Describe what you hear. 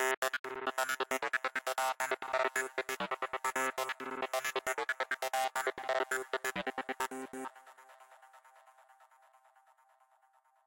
hard trance synth line